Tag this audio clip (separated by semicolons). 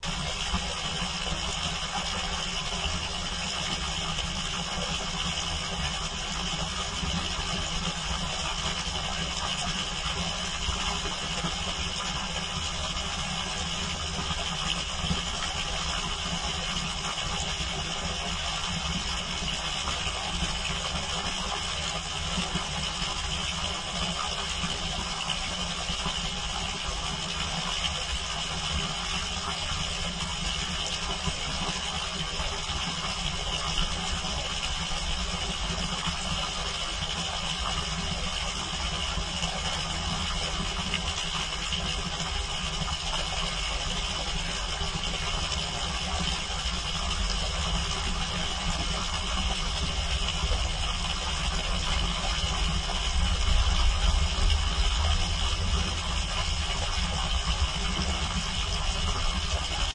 ambient; field-recording; movie-sound; pipe; sound-effect; water; water-spring; water-tank